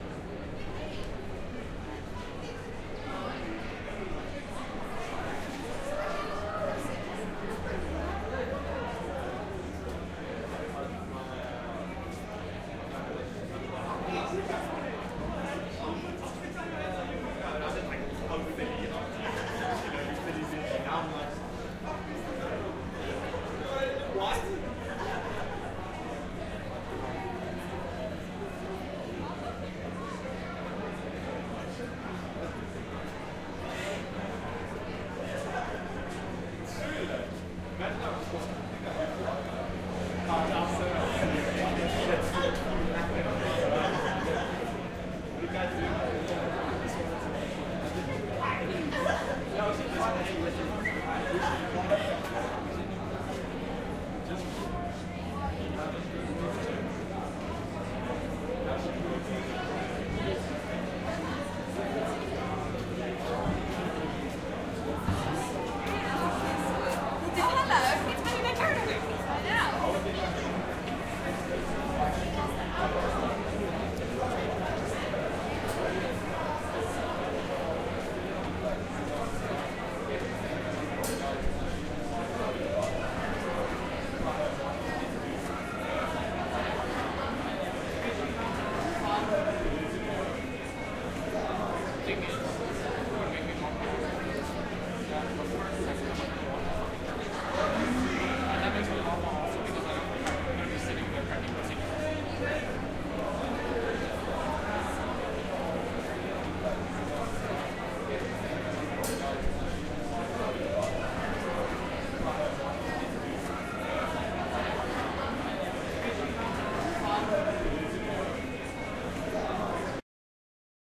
Mall Ambiance New
I recorded a Spur Restaurant inside Menlyn Mall